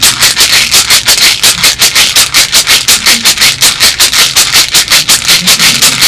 YVONNE maraca
The sound of a vitamin bottle used as a maraca.
bottle, maraca, percussion, shake